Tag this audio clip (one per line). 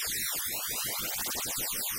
Game-Audio
Sound-Design
Spectral